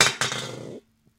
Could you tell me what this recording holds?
Øl Dåse 5
this sound is made using something in my kitchen, one way or another
hit, percussion, kitchen